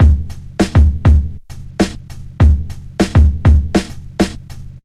Trip Hop Devotion Beat 01
big beat, dance, funk, breaks
big, breaks, beat, funk, dance